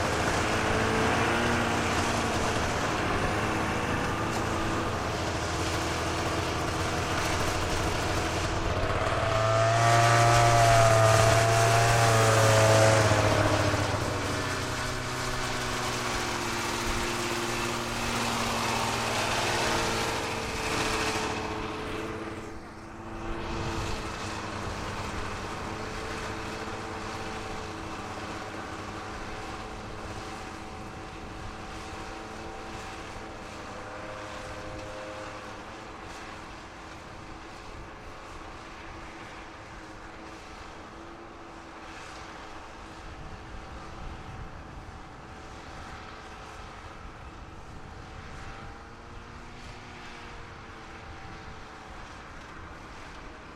snowmobiles driving around and pull away far